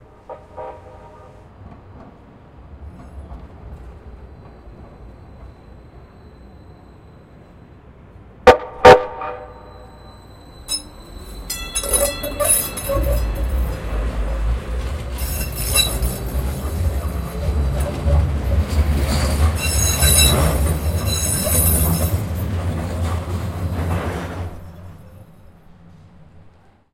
Train LtRail Horn By T0146
Light-rail train passes by, city center Denver. Distant horn at beginning as train approaches, Close horn at :08, close squeals. Tascam DR-40.